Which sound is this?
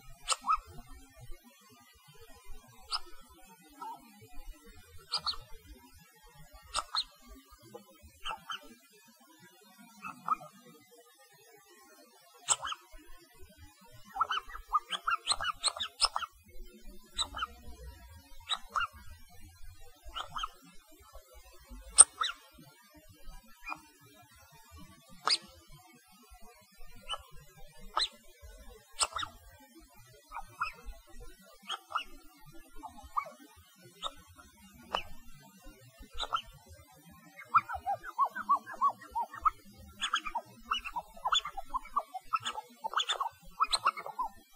toy squeak
these came from a small rubber ducky